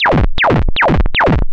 Space Gun 023
Space gun FX sound created with Created using a VST instrument called NoizDumpster, by The Lower Rhythm.
Might be useful as special effects on retro style games.
You can find NoizDumpster here: